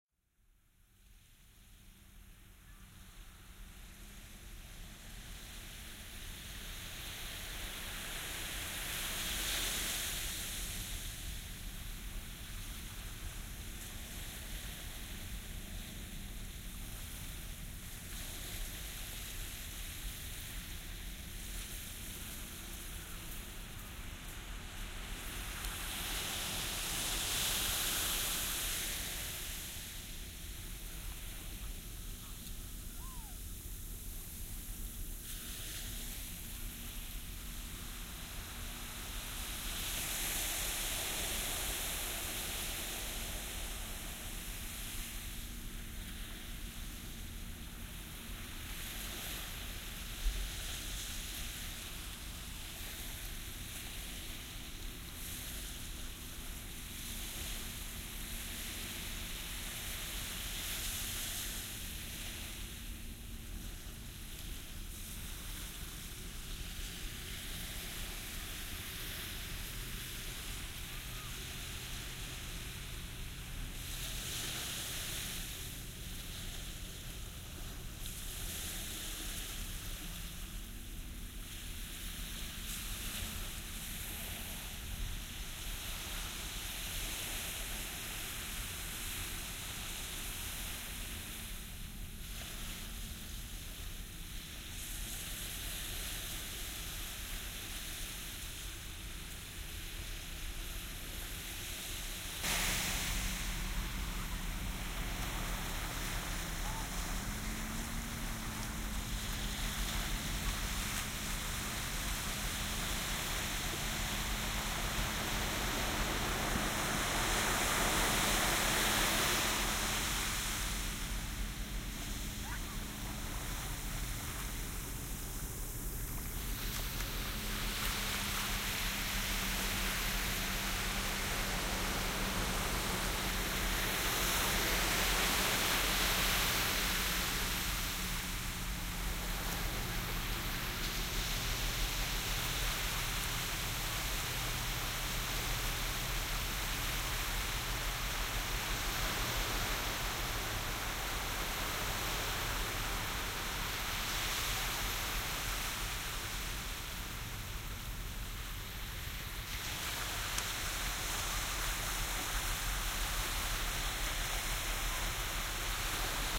Waves sound
waves, coast, ocean, wave, sea, shore, seaside, beach